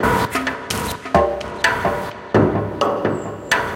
Techno percussion loop Dubby 128bpm
Techno percussion loop made from samples and processed through fx. Suitable for dubby chill out electronic music like dark-techno, dub-techno, house, ambient, dub-step.
techno, ambient, synthesized, dark-techno, effect-pedal, stomp-box, drumcode, dub-step, dubstep, analog-fx, electronic-music, synthesizer, glitch-hop, fx-pedal, dub-techno, zoom-pedal